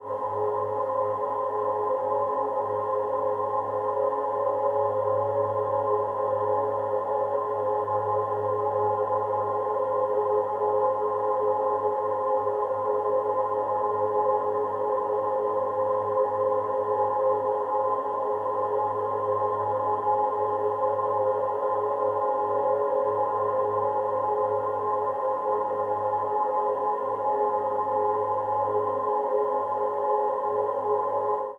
Supernova Choir 1
A motionless choral sample from outer space. Software processed sample of a live choir.
Alien, Choir, Choral, Creepy, Outer, Sci-Fi, Space, Spooky, Voices